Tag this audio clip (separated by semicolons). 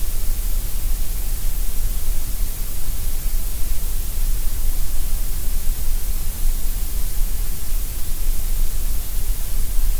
Grey; Noise; Perpectual; Radio